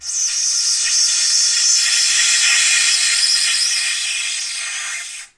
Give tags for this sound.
harsh; howl; styrofoam; bow; high-frequency; harsh-noise; bowed; noise; polystyrene; screech; polymer; plastic; synthetic

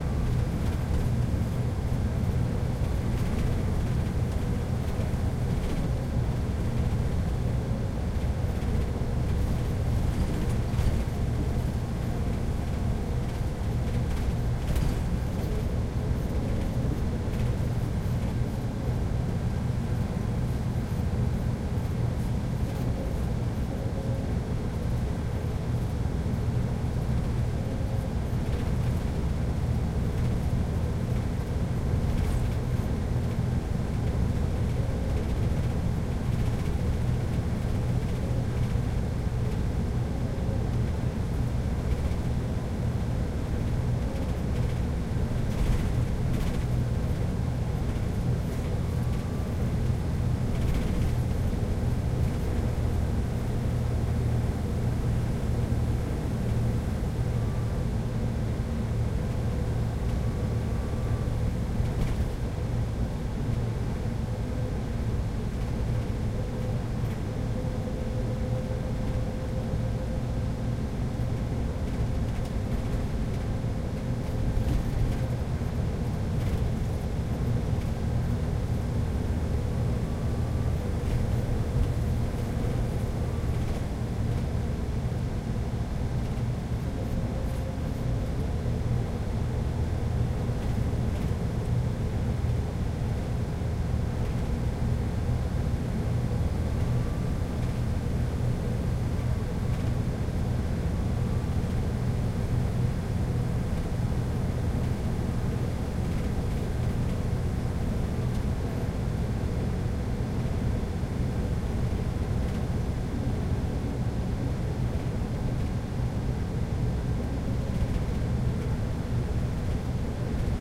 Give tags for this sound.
engine; mechanical

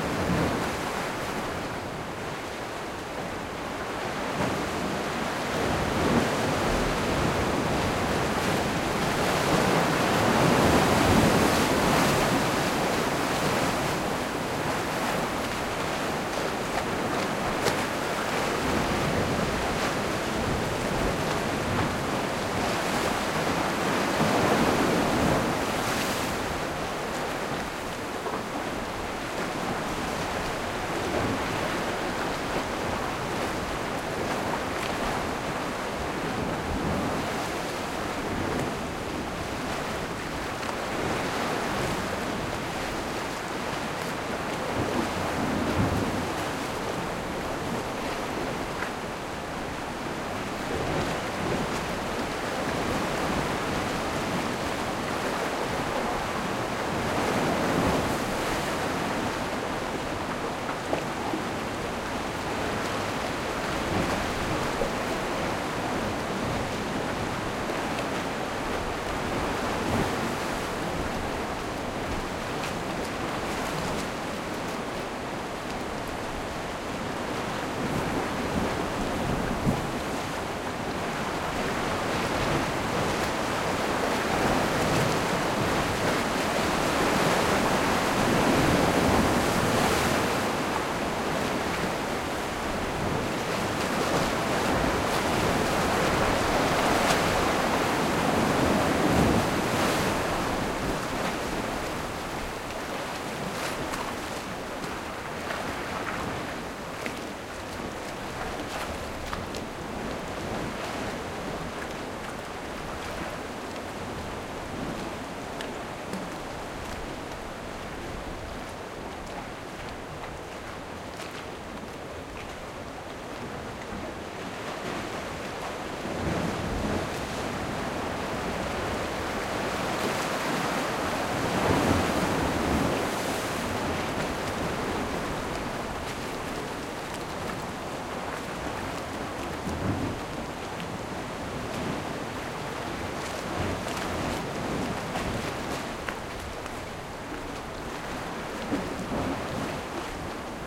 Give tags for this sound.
waves; wet; beach; field-recording; sea; stereo; eaglehawk-neck; surf; ocean; tasmania; wooshing

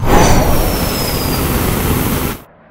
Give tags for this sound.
boost; car; engine; jet; nitro; nitrous; oxide

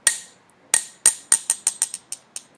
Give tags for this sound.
sound; 2018; enregistrer

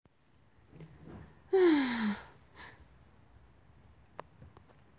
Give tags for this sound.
animal; suspiro

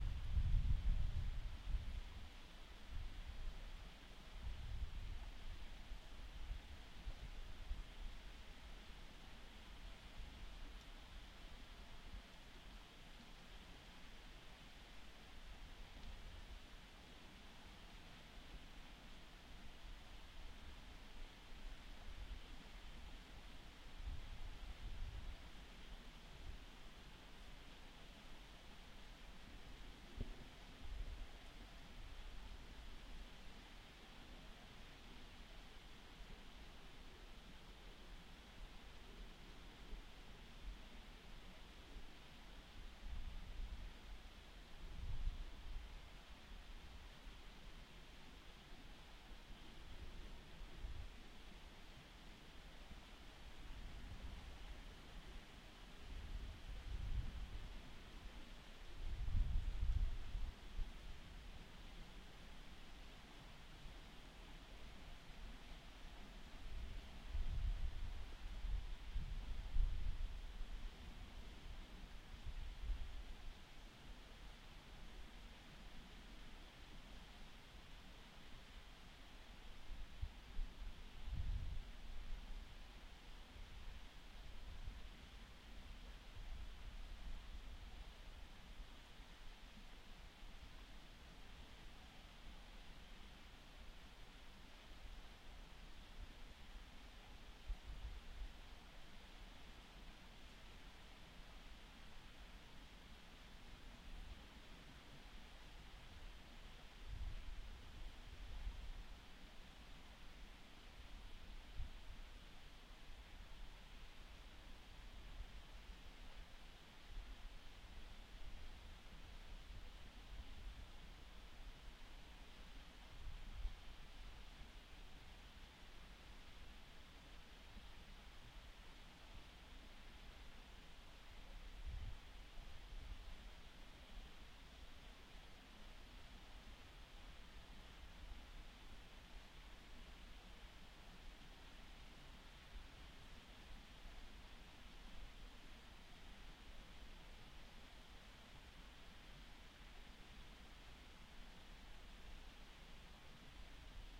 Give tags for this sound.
ambient; wind; nature

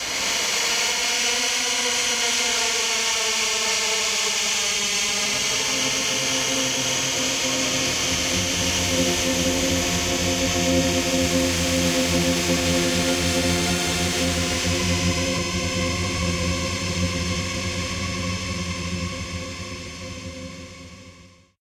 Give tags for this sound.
industrial; metal; screech; synthetic

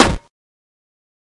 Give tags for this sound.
arcade; ball; bounce; impact; pong; punch; punchy; racket; serve; smash

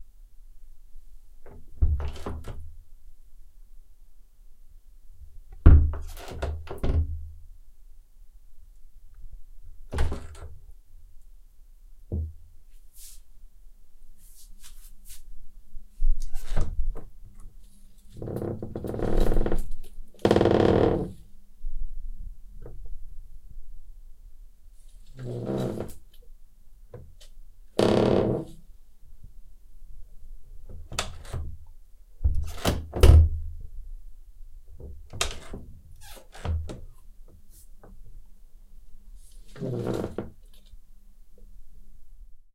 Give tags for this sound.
bathroom; open

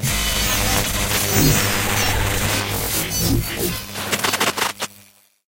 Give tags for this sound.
Mechanical; Machines; Electronic; Space

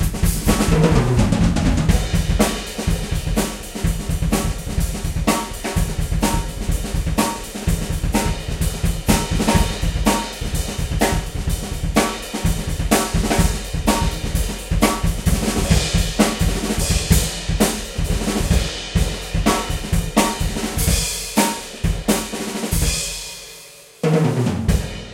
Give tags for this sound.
beat; drum; fill; loop; ride; wild

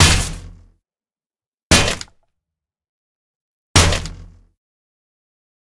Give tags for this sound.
hit; helmet; rattle; bash; plastic; window; pipe; metal; bike

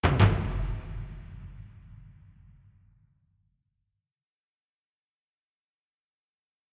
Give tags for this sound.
Drum; Strike-Upward; Strike; Drumming; percussion; Hit; Drums; Upward; assembly; Orchestral; Toms; Theatrical

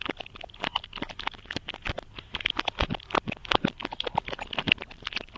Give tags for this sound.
rewind; underscore

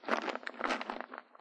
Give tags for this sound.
bug; creature; dice; falling; Foley; jar; nuts; pebbles; rocks; scurry; shake; shuffle; sift; sound; terrarium; toss

